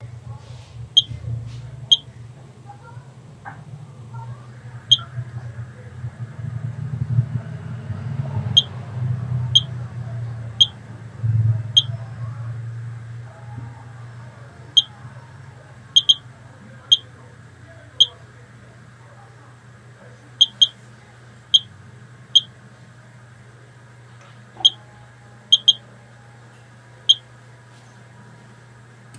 digital G-M counter
Natural radioactivity in my home, recorded with a Pasco Geiger-Müller Counter. I no use radioactive sources
counter, digital, geiger-m, ller